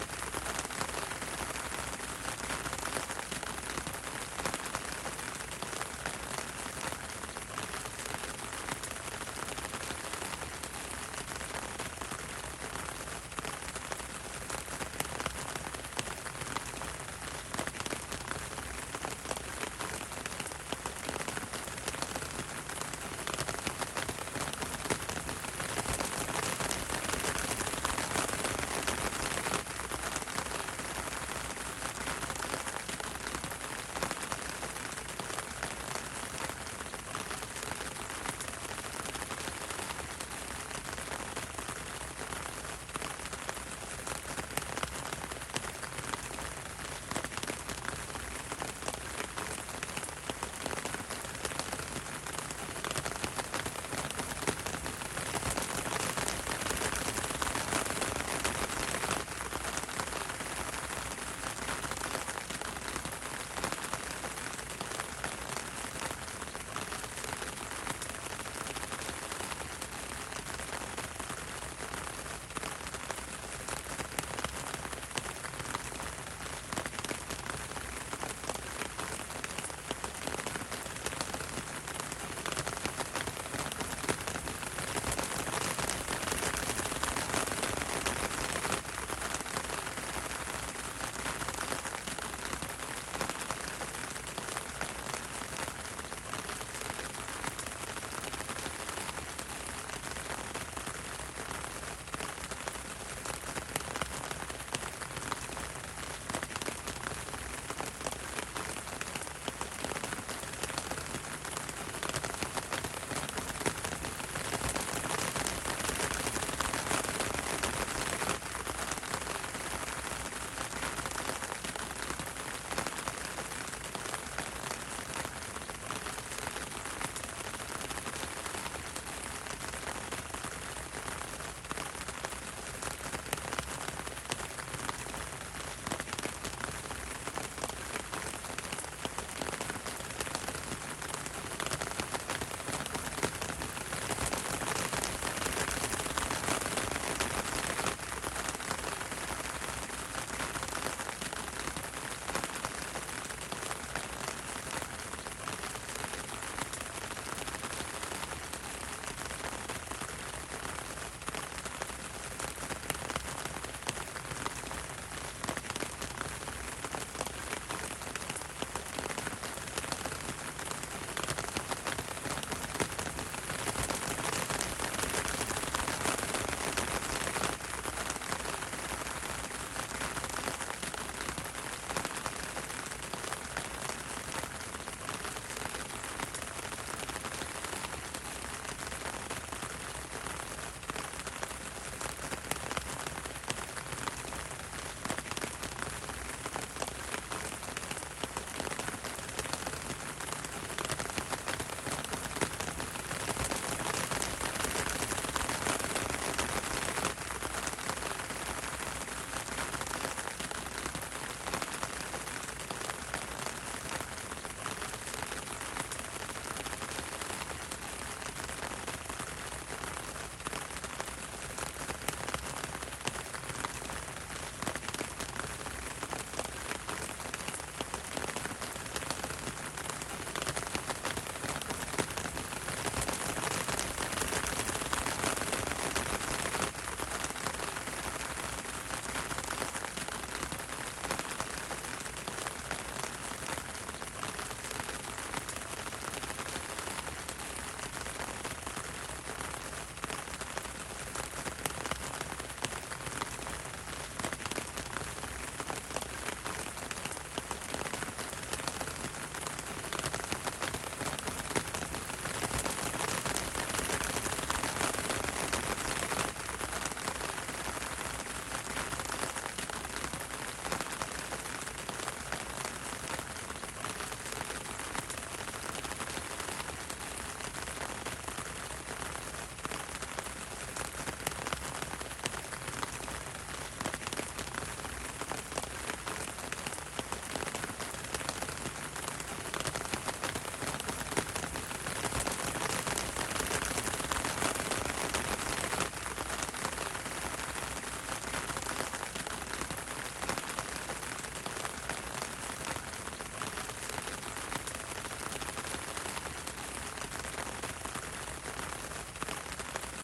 Gentle rain sound
raindrops, rain-on-umbrella, sound-of-rain, drip, drops, water, rain, shower, raining, weather, gentle-rain-sound, dripping